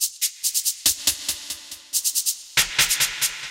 Unique Hats
A hat composition I made in FL Studio :)
high, hip, hop, dubstep, hats, dark